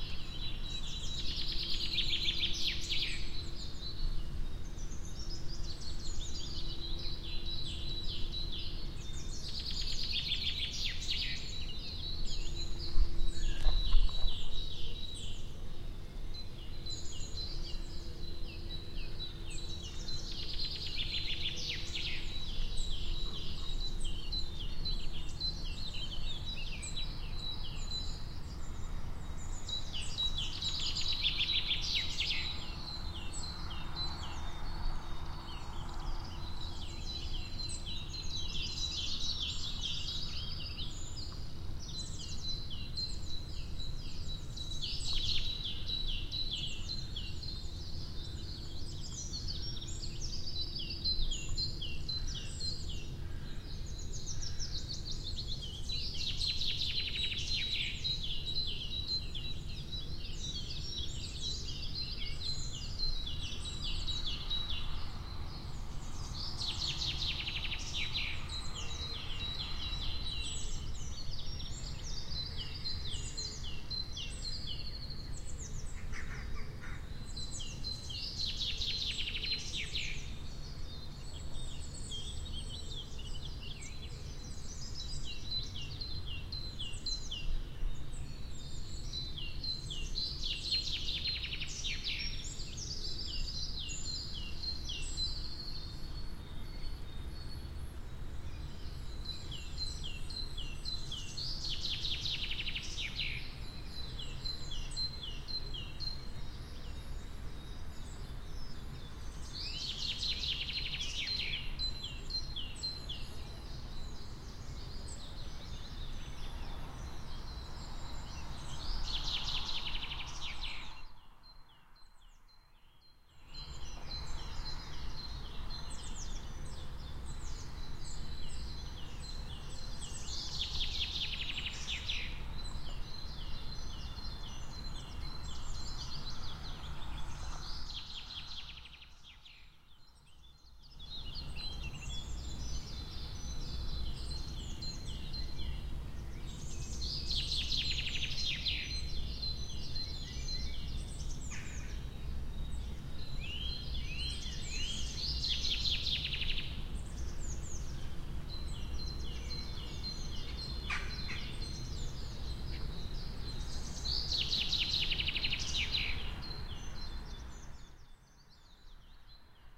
Danish forest ambience, summer. lots of birds etc. Gear FR2, Sennheiser mic. Rycote Zeppeliner.